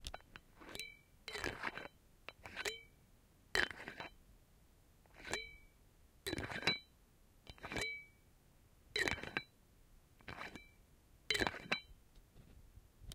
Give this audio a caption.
A metal screw cap lid being taken off and put back onto a glass bottle.